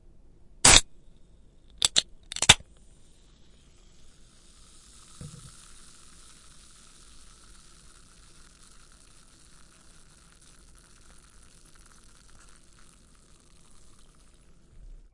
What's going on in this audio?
soda can open
can of coca-cola open
soda, coca-cola